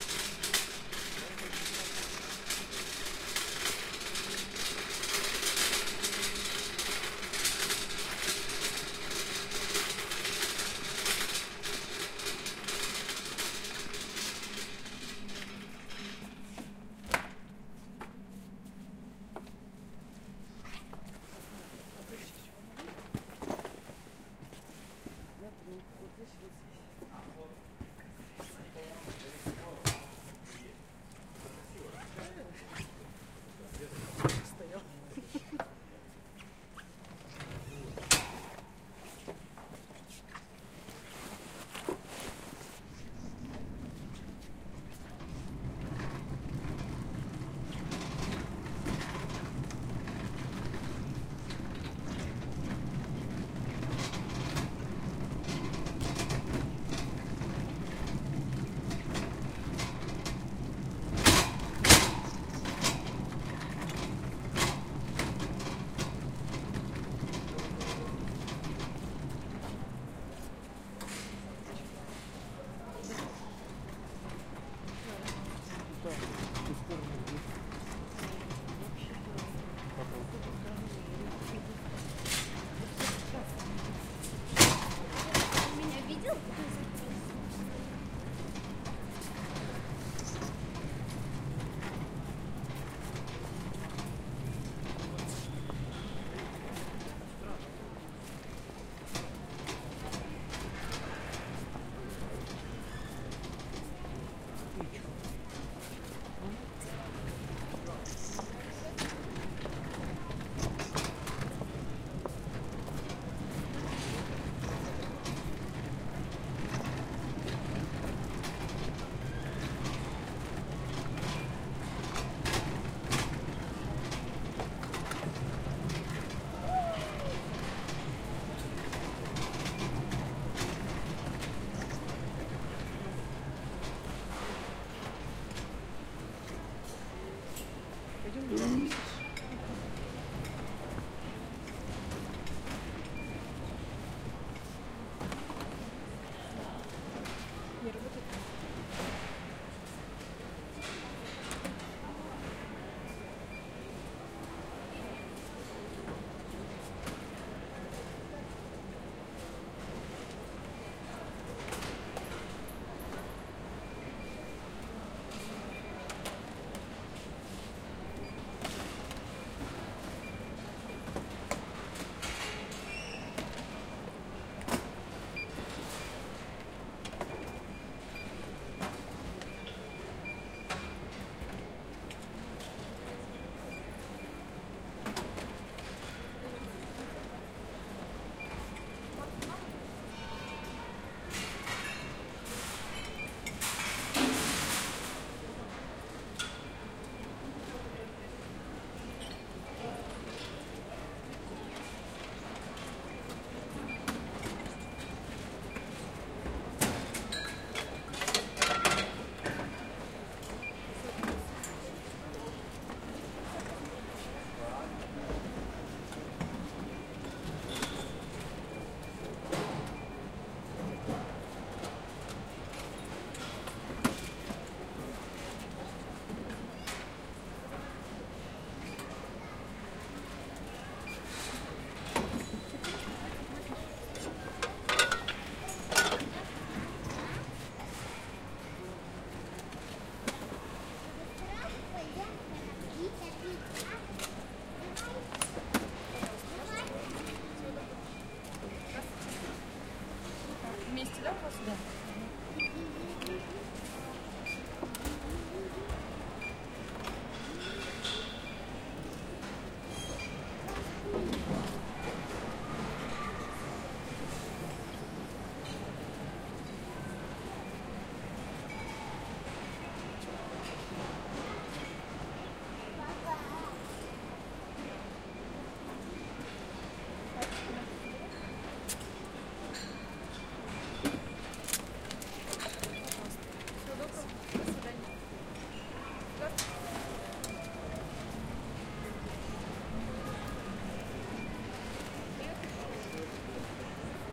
Atmosphere in the shop (Ikea) in the Omsk.
Sound of pushcart. Carriage of the goods to the cash register.
Recorder: Tascam DR-40.